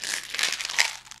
pills in a jar 01 shuffle 01
jar of pills shaken.
bottle, noise, one-shot, pills, shake